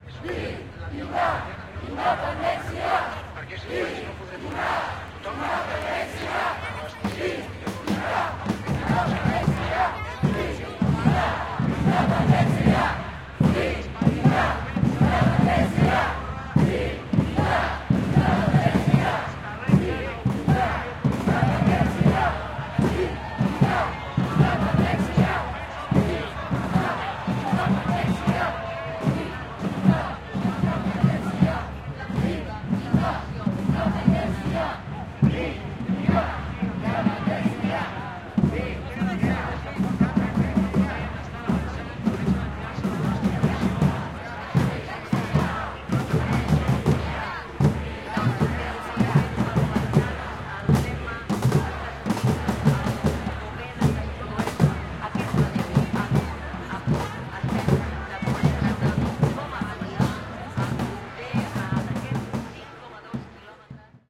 CRITS INDEPENDENCIA MANI 11 SEPTEMBRE 2015 INDEPENDENCE CLAIM
Independence Claim in 11 september's manifestation in Barcelona Catalunya